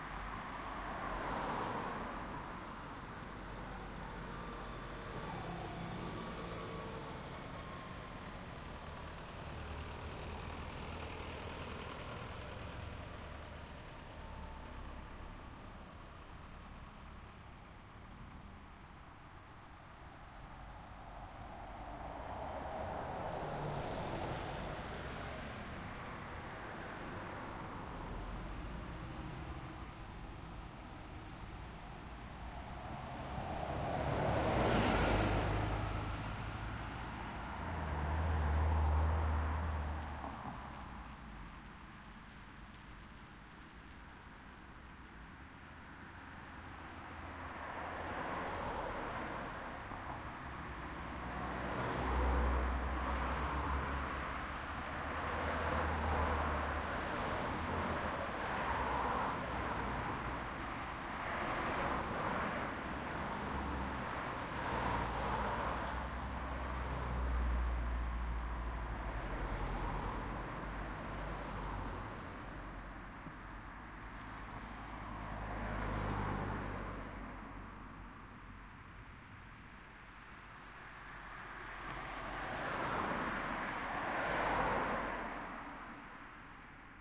Cars passing by 2
Cars and two busses passing by on a nearby street.
Traffic light turns red after ~20 seconds so the vehicles stop before driving along later on.
Recorded on a Tascam DR-07 Mk II.
bus, cars, field-recording, street